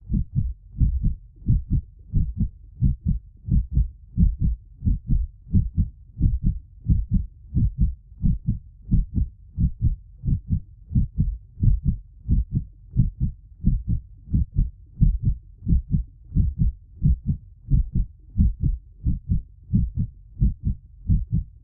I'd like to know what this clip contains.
Heart beat.Pounding.Blood flows in the veins(6lrs)
The sound of an intensely pulsating heart muscle or heart. With concomitant blood pressure murmur. Created artificially. Hope this will be helpful to you. Enjoy it!
I ask you, if possible, to help this wonderful site (not me) stay afloat and develop further.
cinematic, stress, video, rhythm, pump, scary, thriller, dramatic, fear, blood, thump, contractions, afraid, heart-beat, pulse, noise, regular, horror, pumping, heart